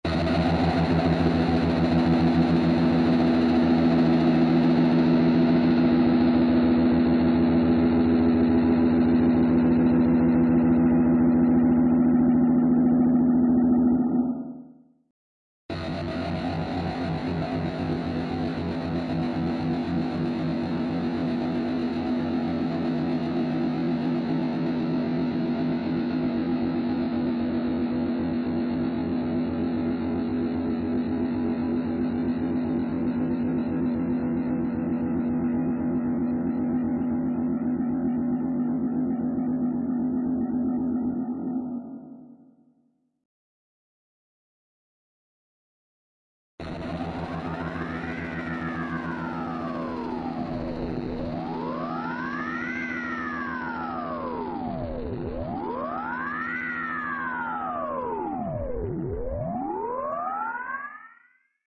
E from a Les Paul heavy processed, 3 edits
Electric, heavy, edit, guitar